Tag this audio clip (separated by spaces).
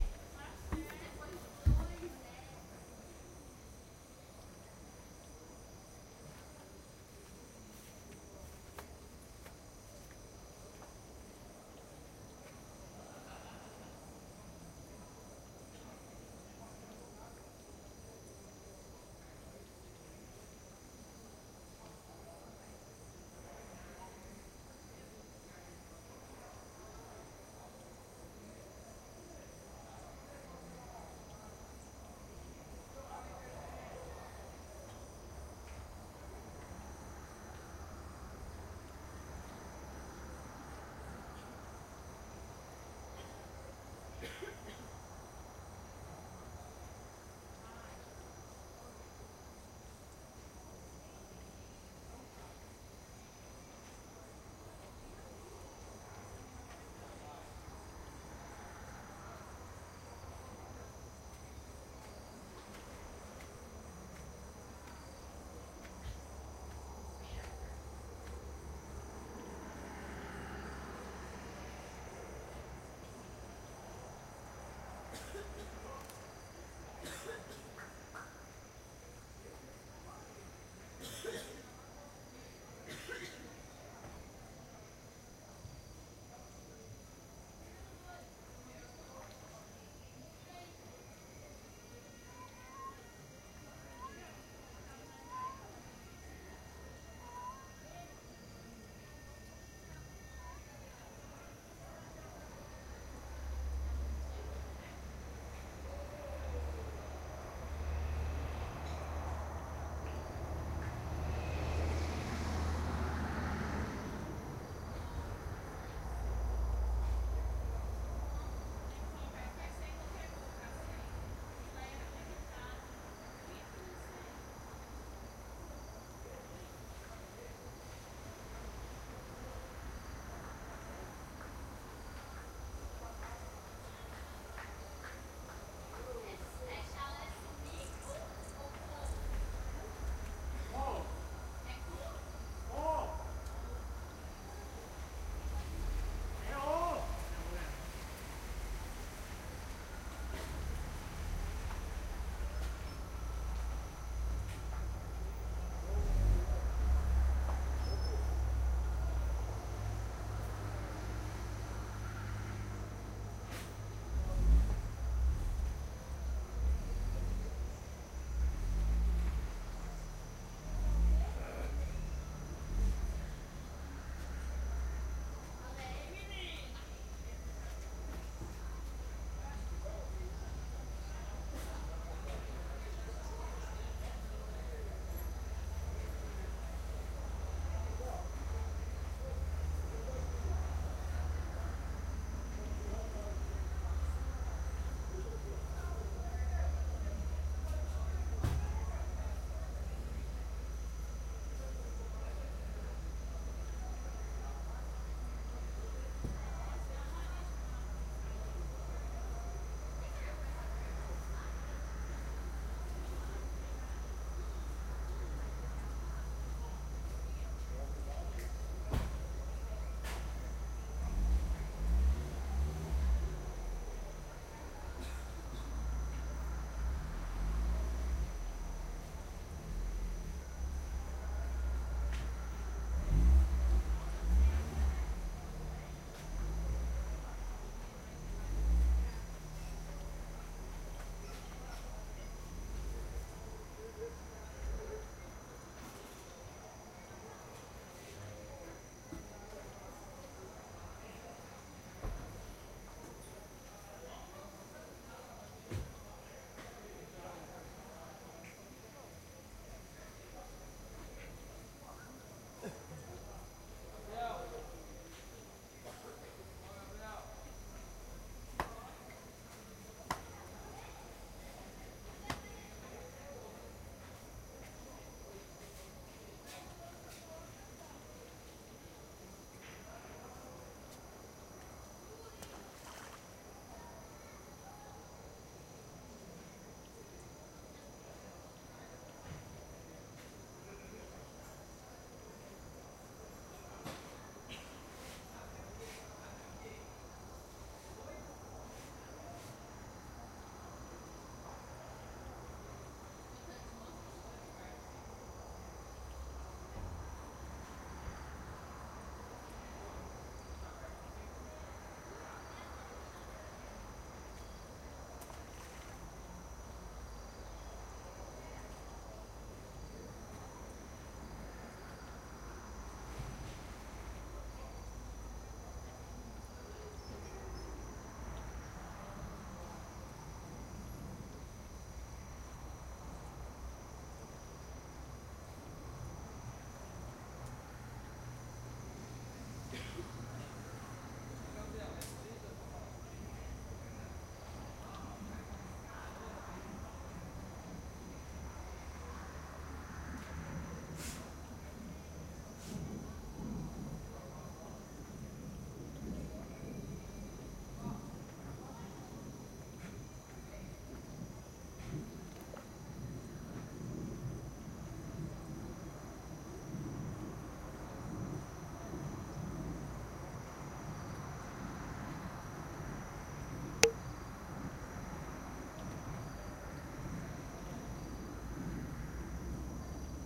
nature insects water ambient night field-recording ambience river